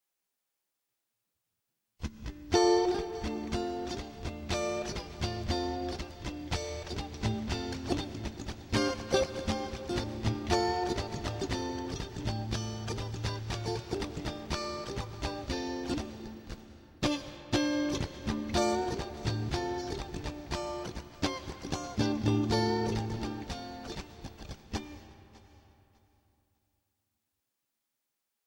Clean Minor Guitar
A funky guitar chord riff....great if used in introductions.
short, clean, guitar, funk, analog, minor